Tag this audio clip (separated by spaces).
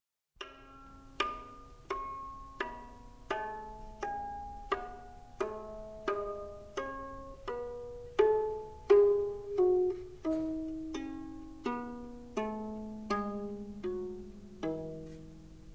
ancient Iron